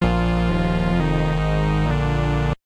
Korg MS-20